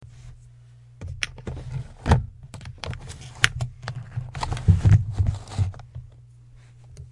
Plastic Drawers Opening
Opened up a small 3 drawer plastic filing cabinent